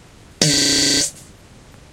fart poot gas flatulence flatulation explosion noise weird
gas, flatulence, explosion, weird, poot, fart, flatulation, noise